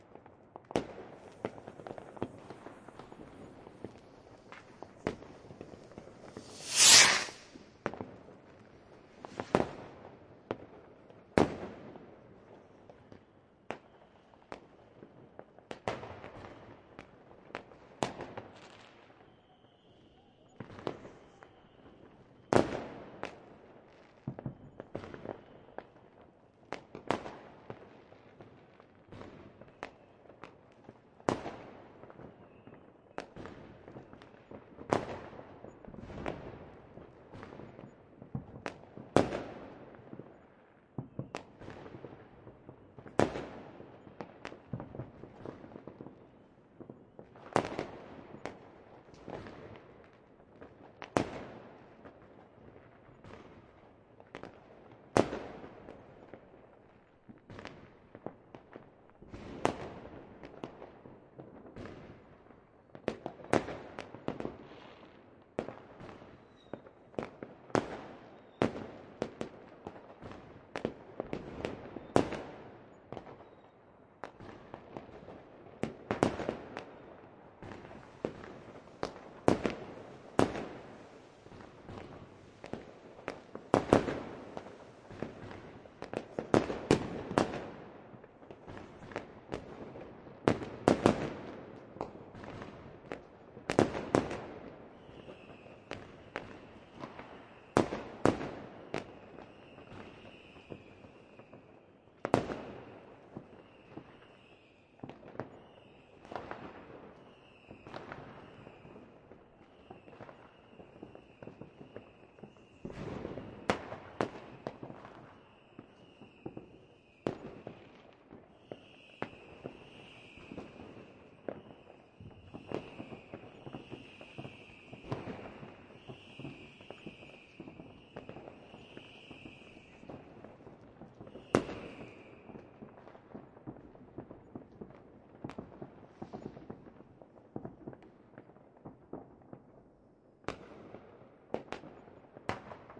sylvester newyear fireworks close launching rocket echoing
new year 2014 at 0:30 AM 'ORTF' stereo recorded on Marantz PMD 661 with 2 TLM 103
bangs, close, crackle, echoing, fireworks, launching-rocket, near, pops, pyro, pyrotechnics, sylvester